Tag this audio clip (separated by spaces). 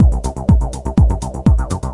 loop tb